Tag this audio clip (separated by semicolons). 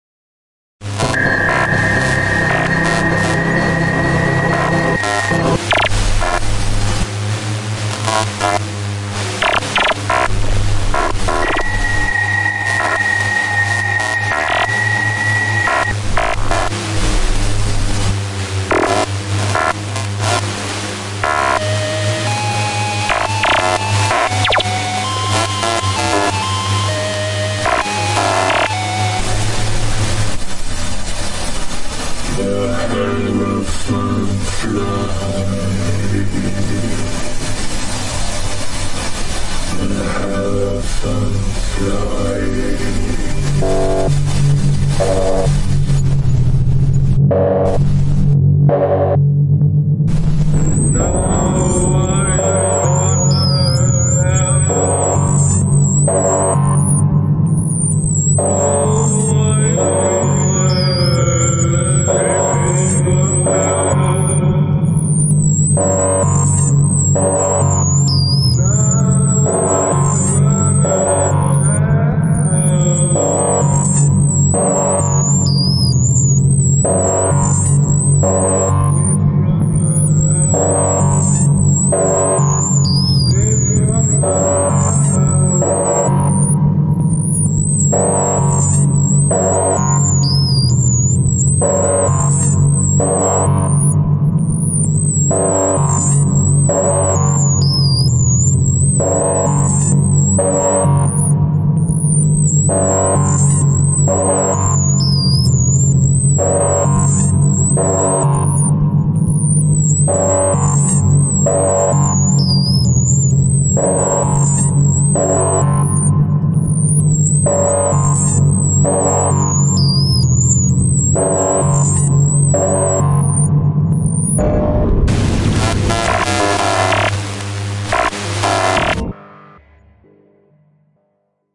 Dog; EL; electric; horse; King; pizza; sound